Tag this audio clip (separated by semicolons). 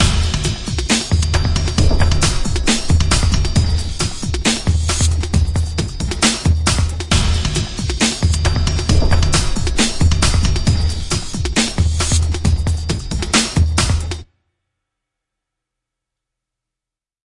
Hip-Hop; Pro; Loop; heavy; Drums; 100; Free; DubStep; big; fat; powerfull; loud; Beat; pattern; Professional; 90; Music; Experimental; Good; 80; bpm; Rap; Processed; Quality